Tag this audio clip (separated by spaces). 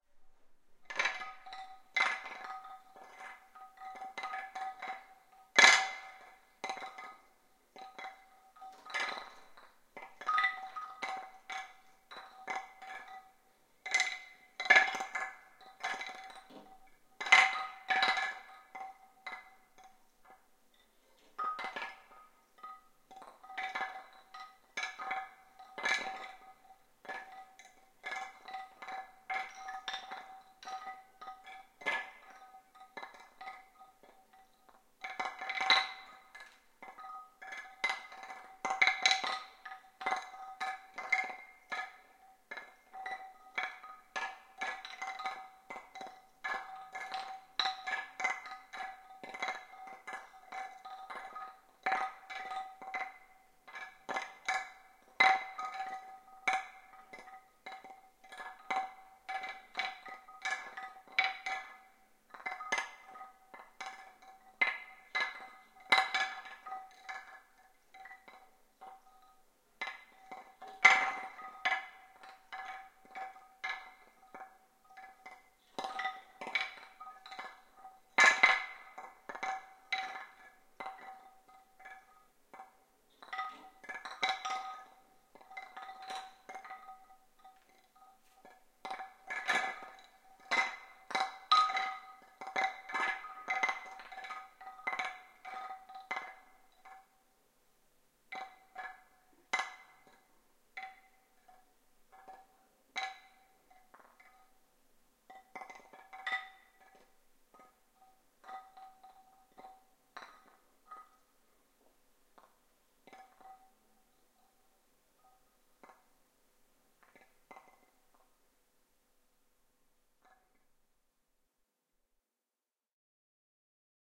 block blocks clink clonk wooden